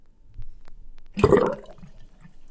drain finishing/glug
drain, glug, gurgle, water